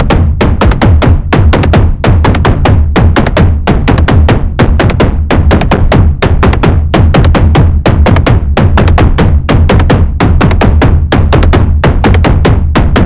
loud, screaming, synthetic tekno shit.